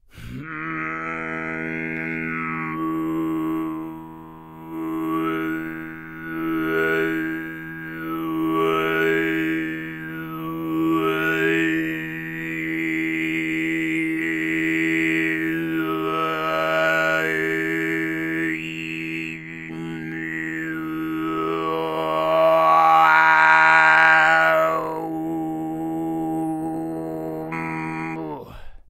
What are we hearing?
alfonso low 18
From a recording batch done in the MTG studios: Alfonso Perez visited tuva a time ago and learnt both the low and high "tuva' style singing. Here he demonstrates the low + overtone singing referred to as kargyraa.
overtones, tuva, kargyraa, throat